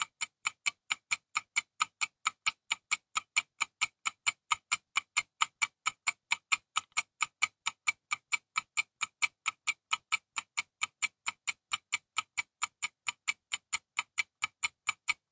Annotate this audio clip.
Count Down Clock
clock, clockwork, hour, ticking, tick-tock, tic-tac, time, timepiece, wall-clock